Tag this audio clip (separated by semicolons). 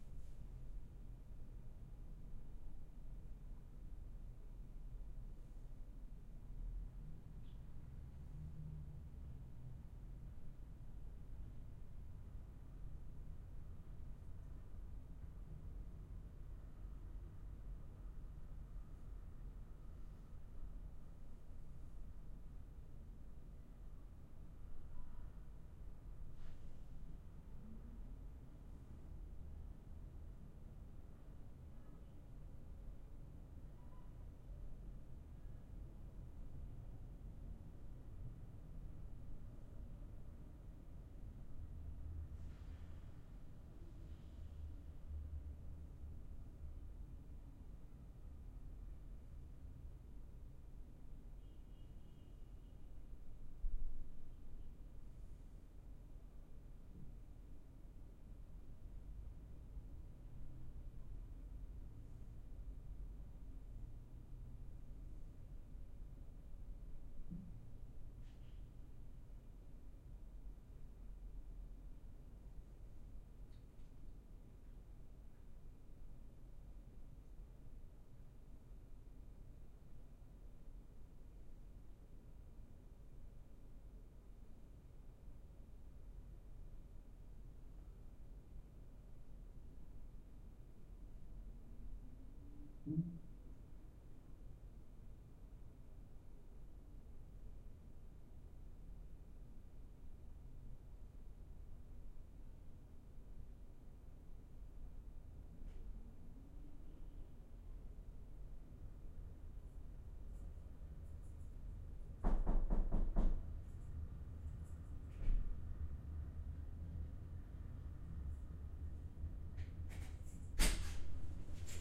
ambiance; ambient; atmosphere; field-recording; general-noise; room-tone; roomtone